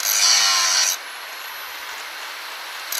Fein angle grinder 230mm (electric) touching steel once.